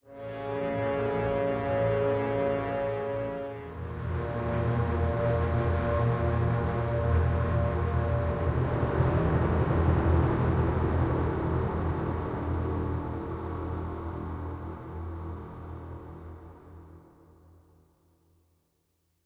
GameOver Dark
Short Game Over Music I just created for my own personal project. Hope it helps someone.
Game, Music